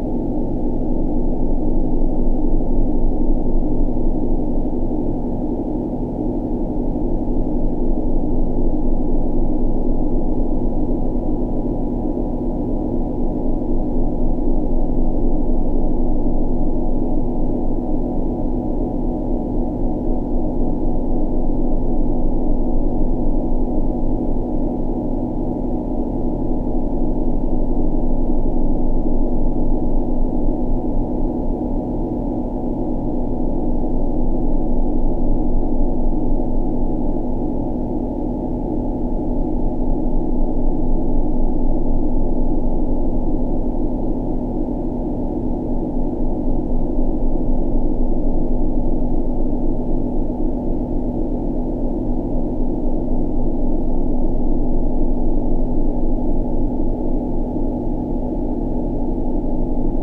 3x256 500k reso 1000hz y freq float 1pointfloat
Sound created from using the rings of Saturn as a spectral source to a series of filters.
The ring spectrogram was divided into three color planes, and the color intensity values were transformed into resonant filter cutoff frequencies. In essence one filter unit (per color plane) has 256 sounds playing simultaneously. The individual filters are placed along the x-axis so, that the stereo image consists of 256 steps from left to right.
In this sound of the series the spectrum was compressed to a range of 20 - 1000 hz. A small variation in certain divider factor per color plane is introduced for a slight chorus like effect.
chorus, experimental, fft, filter, noise, resonance, resynthesis, saturn, space